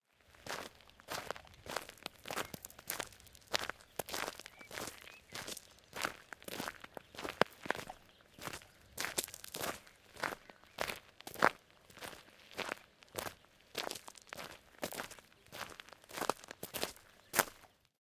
Foot Step Macadam
footsteps, step, foot, footstep, walk, walking, macadam, steps, outdoor